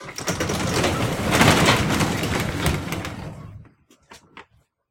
This is the sound of a typical garbage door being opened.